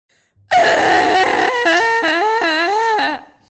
A desperate cry for help of a young woman, motivated by the existential anxiety of the modern world
voice, human, cry, yell, female, desperate